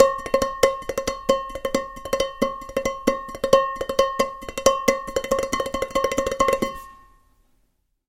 Stomping & playing on various pots